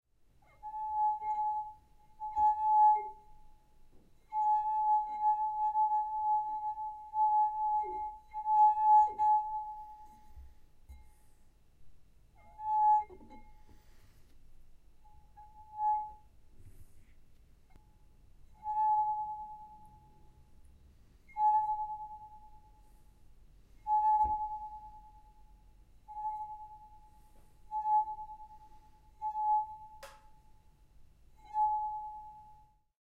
A wine glass being played in different ways.
glass, hydrophone, tonal, tone, wine, Wineglass